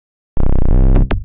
Gear shift
game, Alien, space